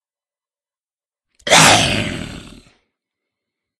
Me growling angrily into my mic to immitate a monster.